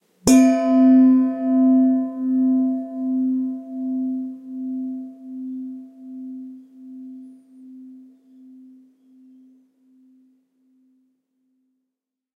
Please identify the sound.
Metal Bowl
The sound of a stainless steel mixing bowl being struck. Recording chain: Rode NT4 (stereo mic) - Sound Devices MixPre (Mic pre-amplifier) - Edirol R44 (digital recorder).
bell, bowl, ding, gong, metal, metalic, sonorous, stainless-steel, steel, struck